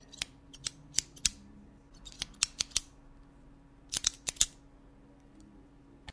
ballpoint pen klick klack